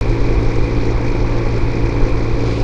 heater constant
An electrical resistance heater (space heater) while running. The heater is believed to an Arvin Heatsream 1000.
Recorded directly into an AC'97 Soundcard by a generic microphone.